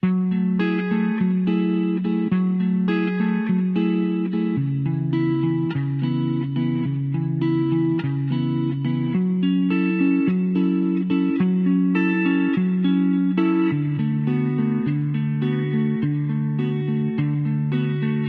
Indie Rockin' 6.1
Please use it sample and make something good :-)
If you use this riff please write my name as a author of this sample. Thanks. 105bpm
angel, background, calm, drama, dramatic, emo, evil, impending, increasing, peaceful, phantom, phrase, plucked, relaxed, repetition, rock, terror, tranquil